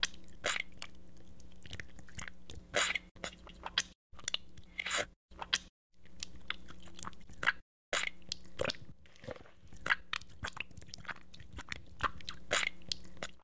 Disgusting Slop
Created by squishing wet oatmeal in a bowl, this sounds like gloppy slop.